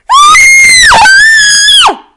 A Scream of a Girl that I recorded.
horror, screams